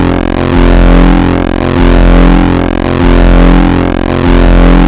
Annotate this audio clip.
Distorted sin wave scream 3 [LOUD]
processed, noisy, hard, noise, loud, distortion, flstudio, experimental, sfx, dark, gabber, distorted, vst, hardcore, sine